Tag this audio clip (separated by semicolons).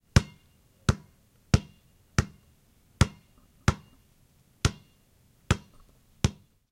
basketball; bounce; bouncing